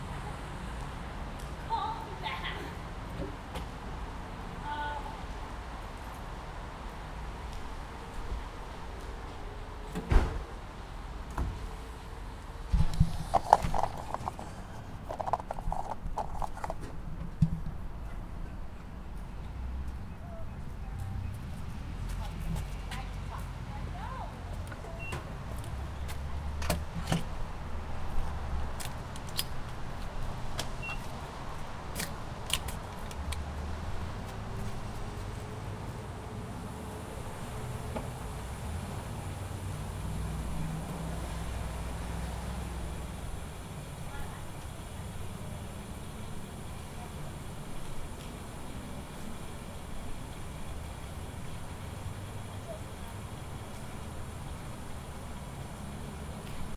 Me pissing away my entire net worth at the gas station (or duel pump, or petrol flat, depending where you are). I waited patiently for the bimbettes to stop chirping about nonsense but caught enough of them in the beginning. Forgot to put the fuel cap back on too, damn I thought this crap was easy.
automotive, car, gas-station